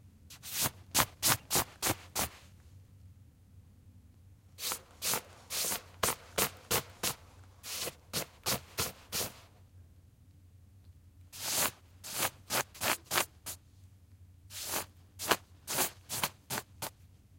Brushing off a down/nylon jacket, as if dusting snow (or rain or dust) off of someone's shoulders. Could be used for many different swishing noises.
Recorded with stereo NT1a mics in a soundbooth. Volume boosted in Audacity. No other effects.